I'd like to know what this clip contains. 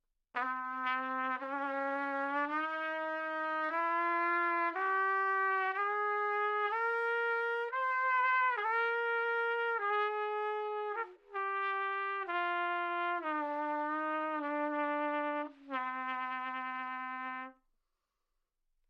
Trumpet - B natural minor - bad-pitch
Part of the Good-sounds dataset of monophonic instrumental sounds.
instrument::trumpet
note::B
good-sounds-id::7448
mode::natural minor
Intentionally played as an example of bad-pitch
minor, trumpet, good-sounds, scale, neumann-U87, Bnatural